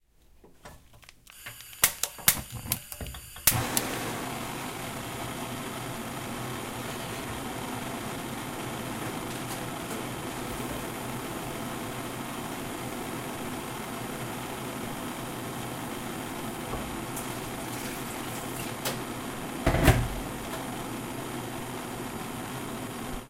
Stove Full
Firing up the stove. Recorded with the internal XY mic of the Zoom H5.
Cooking, Fire, Flames, Ignite, Kitchen, Stove